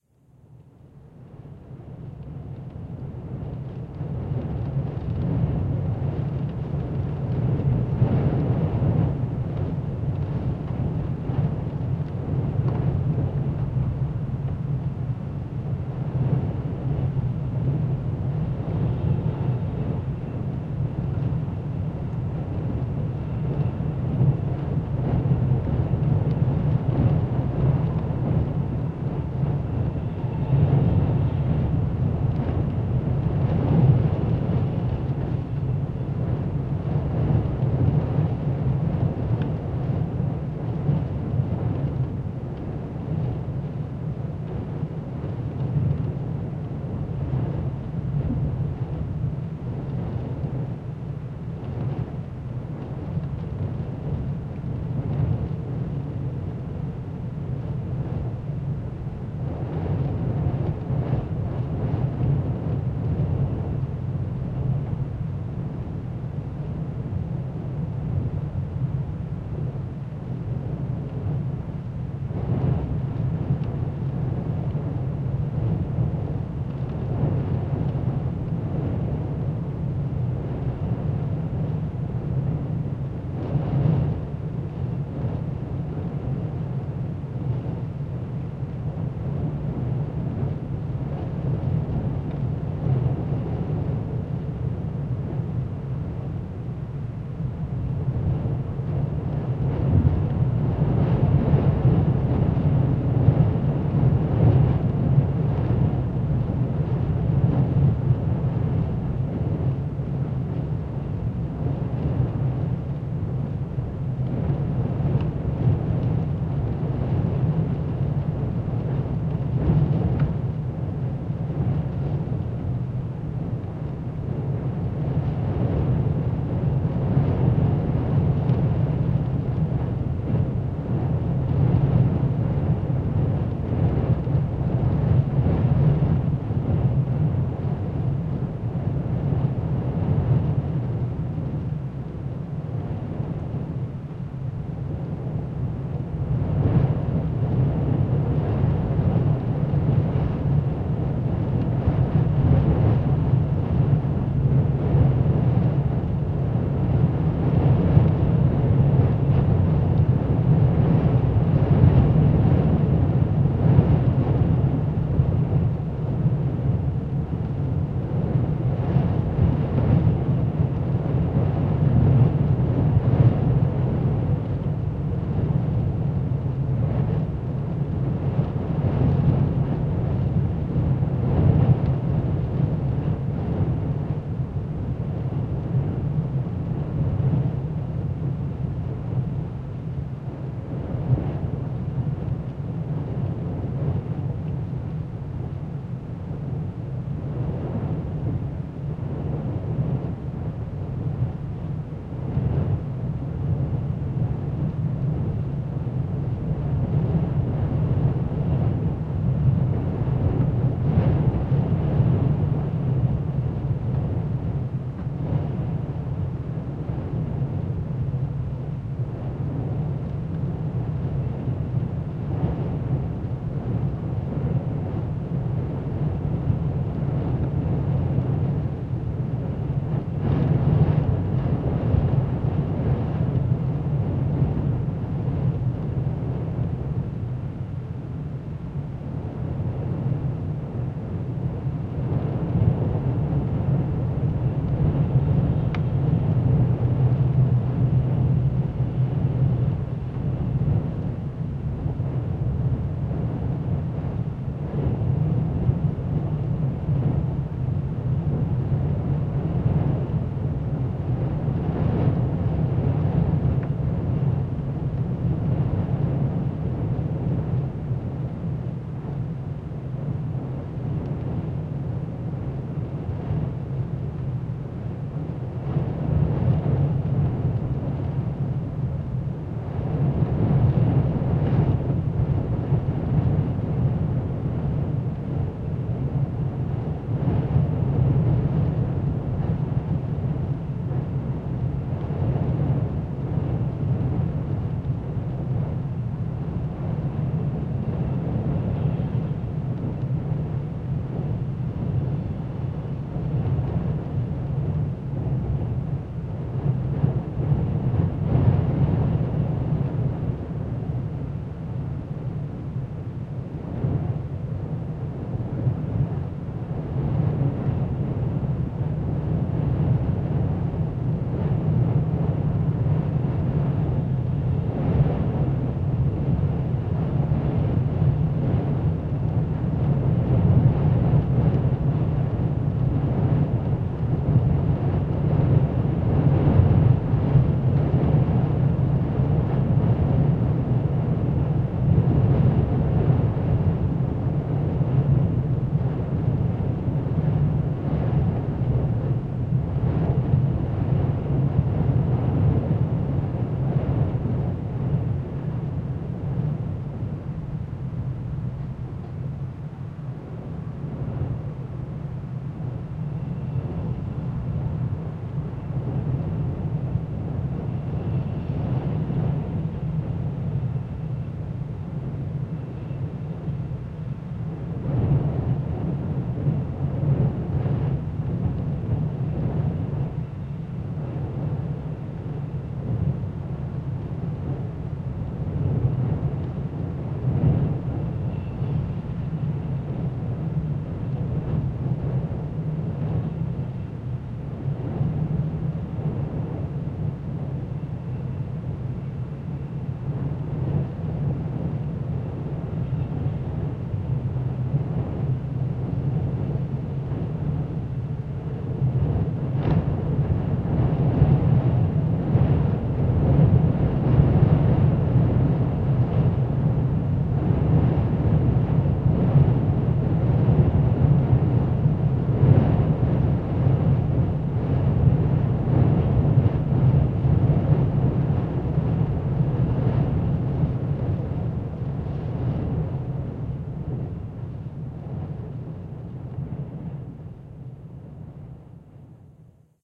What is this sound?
Recording of the winter storm nemo passing through Isle of Skye. The recordings were made from inside a small house.